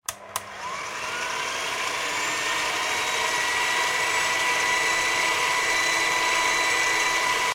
mixer inside the kitchen
kitchen, cream